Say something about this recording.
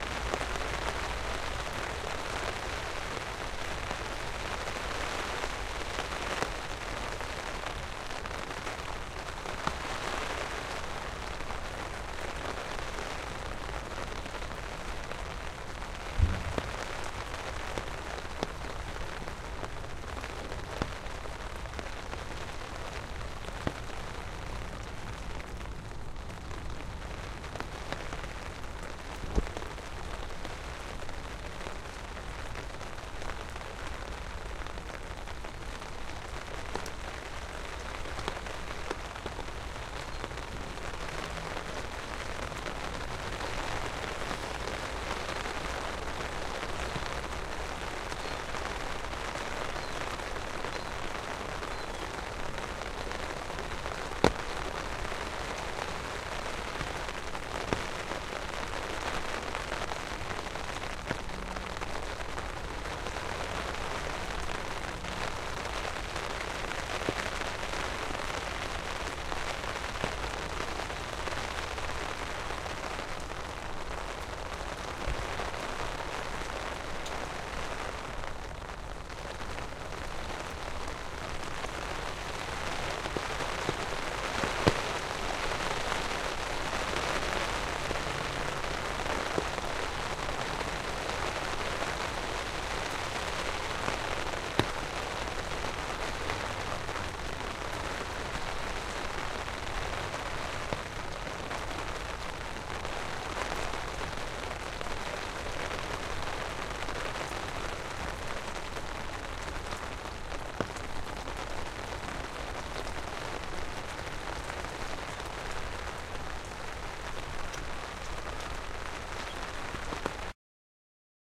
Rain falling onto umbrella
Rain falling onto an umbrella.
rain, umbrella, sounddesign